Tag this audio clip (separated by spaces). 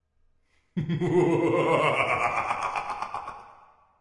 maniac laugh crazy